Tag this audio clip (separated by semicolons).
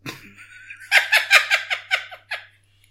witch; witchy; female; laugh; granny; grandma; woman; girl